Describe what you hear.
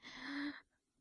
A surprised girl.
female, girl, human, shock, vocal, voice, woman